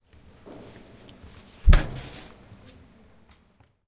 We captured the sound of throwing a flyer into the trash bin located in the entry of the upf poblenou library. We can hear how the paper hits the boundaries of the bin and then the sound of the friction with the plastic bag. The recording was made with an Edirol R-09 HR portable recorder.
campus-upf, crai, dustbin, garbage, junk, library, trash, upf, UPF-CS14